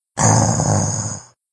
A growl I made using an app on my phone, my throat, and a few tweaks in Audacity to clean the sound up. Enjoy!